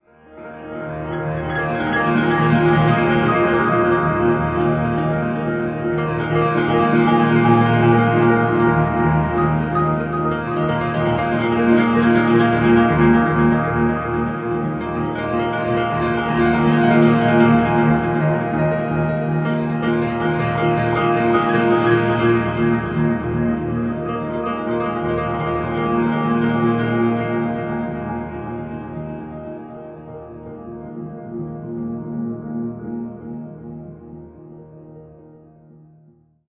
Seven Thunders Echo 5
I improvised at the keyboard over multiple tracks and brought the midi into Music Maker then brought up the tempo, and applied echo, delay and effects phaser.